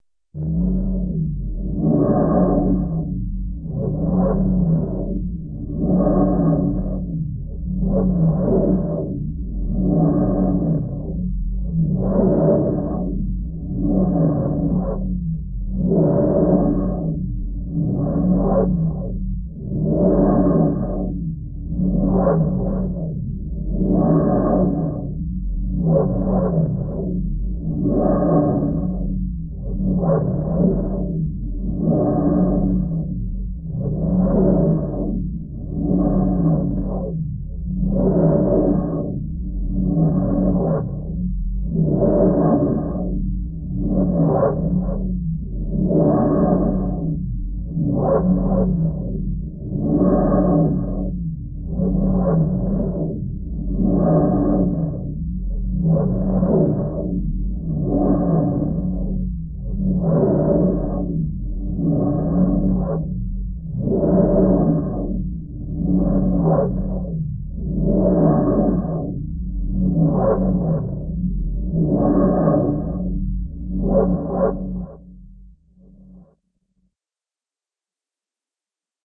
alien landscape 2
a lo fi aural interpretation of an alien landscape.....circa 1950.
50s, lo-fi, sci-fi, alien-effects, alien-fx, other-world, alien-landscape, alien, spaceship, b-movie